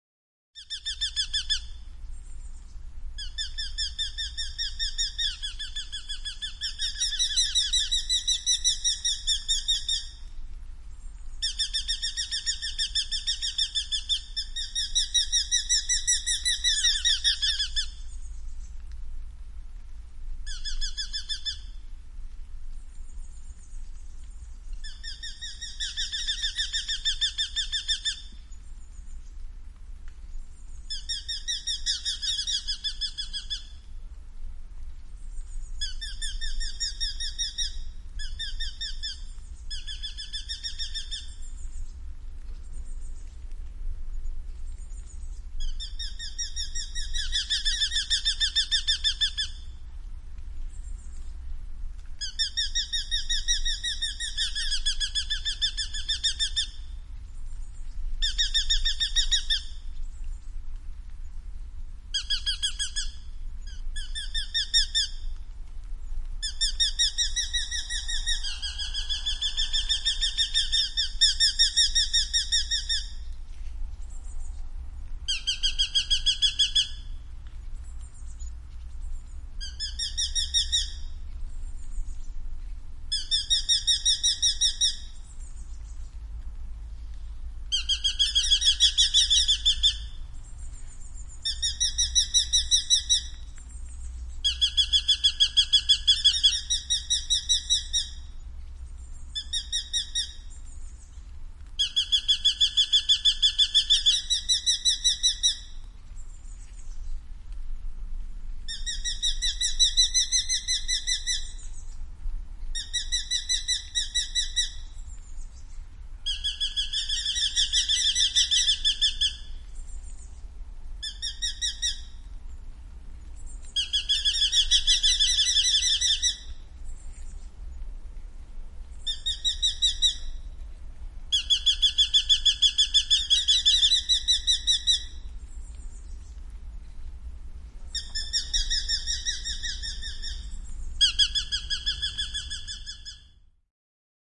Nuolihaukka / Northern hobby, sometimes two, calling, flying over a lake, faint rain and thunder in the bg (Falco subbuteo)
Nuolihaukka, välillä kaksi, huutosarjoja, lentävät järven yllä. Taustalla heikkoa sadetta ja jyrinää. (Falco subbuteo)
Paikka/Place: Suomi / Finland / Vihti, Hiidenvesi
Aika/Date: 01.08.2002
Luonto, Lintu, Yleisradio, Call, Suomi, Field-Recording, Tehosteet, Nuolihaukka, Haukka, Northern-hobby, Birds, Yle, Linnut, Finland, Falcon, Nature, Animals, Bird, Haukat, Hobby, Soundfx, Finnish-Broadcasting-Company, Huuto